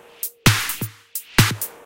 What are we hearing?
part of kicks set